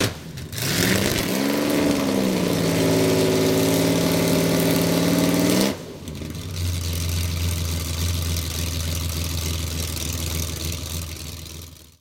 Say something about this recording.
ferrari340america2
Late 50s Ferrari 340 America being revved. 4 litre v-12 engine. At Peter Markowski's RPM Motorsports garage in Vergennes, Vermont, circa 1997. Recorded with a Sony D8 DAT and Audio-Technica ATM10 condensor mic. The first car sound I ever recorded! What a fantastic car.
america, ferrari